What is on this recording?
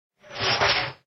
Machine Mechanisme Friction
Clear sound of metal friction in a machine can be used for industrial or background sounds.
Thank you for the effort.